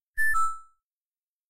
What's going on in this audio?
Computer Chimes - Notification
Please enjoy in your own projects! Made in Reason 8.